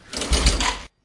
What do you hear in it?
ATV Pull Start 2
low, Buzz, Machinery, electric, Mechanical, motor, machine, Factory, Rev, engine, Industrial